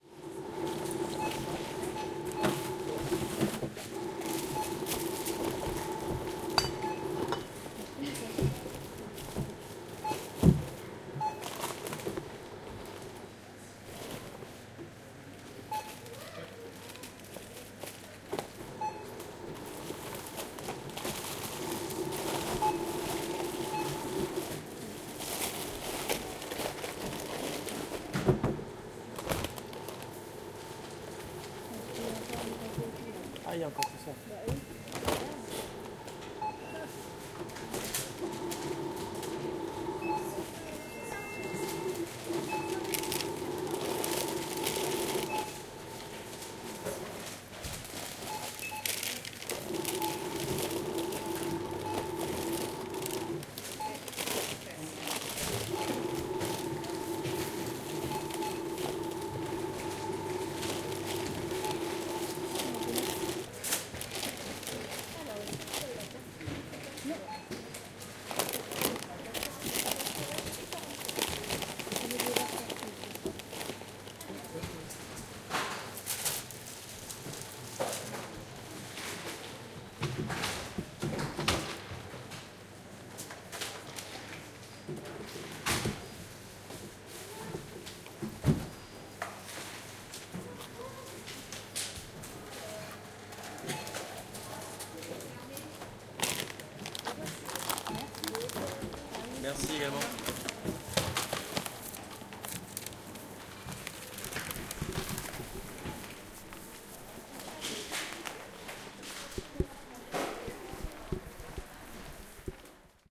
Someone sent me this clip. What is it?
AMB Caisse Supermarché
A la caisse d'un Supermarché, sur le tapis roulant
At the checkout of a supermarket, on the treadmill